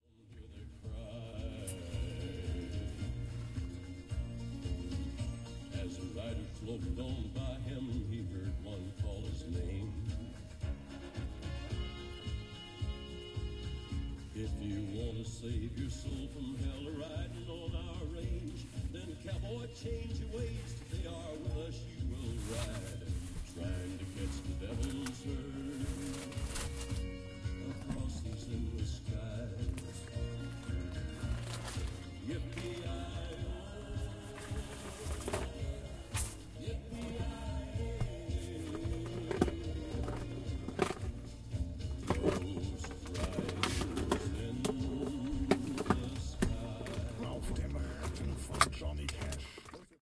110809-wild parking
09.08.2011: tenth day of ethnographic project about truck drivers culture. ambience of the truck cab during the night pause - music. Germany, wild car/truck park between Wisschafen and Ottendorf.
ambience, body-sound, field-recording, music, radio, wild-parking